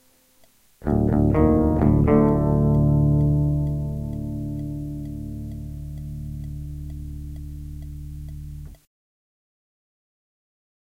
CLN GUIT FX 130BPM 5
These loops are not trimmed they are all clean guitar loops with an octive fx added at 130BPM 440 A With low E Dropped to D
DUST-BOWL-METAL-SHOW, 2-IN-THE-CHEST, REVEREND-BJ-MCBRIDE